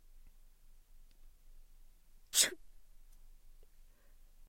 young woman sneezing